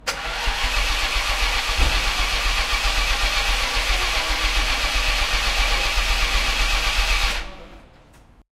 Maserati ignition 1
ignition, engine, vehicle, car, sports, automobile